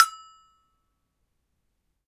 Sample pack of an Indonesian toy gamelan metallophone recorded with Zoom H1.